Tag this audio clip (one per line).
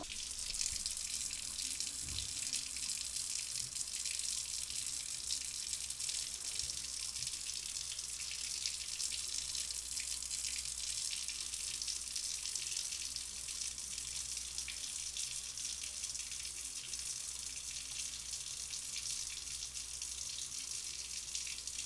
ceramic
home